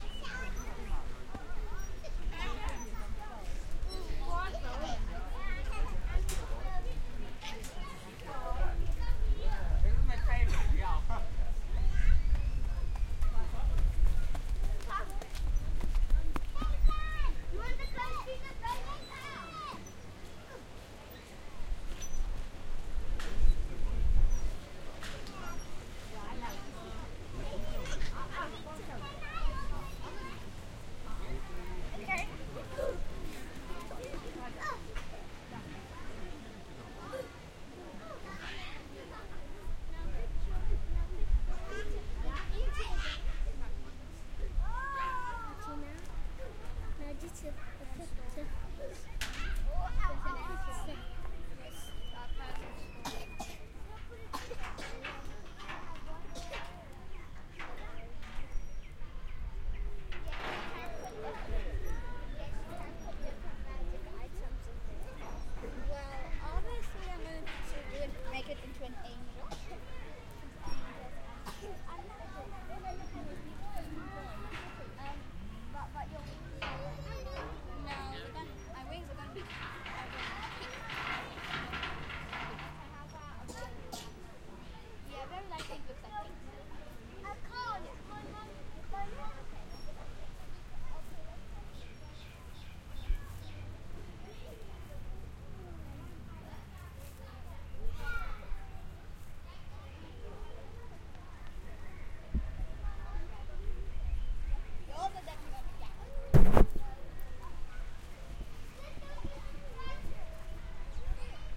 Kids in playground on farm
Play-ground, Field-recording, Kids, Jungle-gym, Nature, Irene-Farm, Animals, Peaceful, OWI, Farm-yard